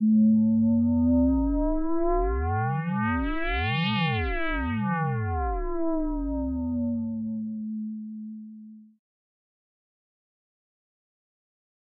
Whale from Wales
A curious sound similar to a whale.
animal, synth, marine, whale